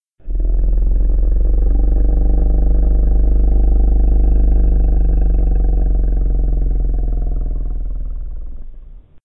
Monster Long Rumble 3
A long monster rumble.
fantasy, monster, long, rumble, creature